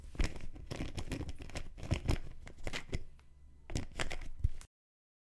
A plastic bottle cap opening.
bottle, bottle-cap, plastic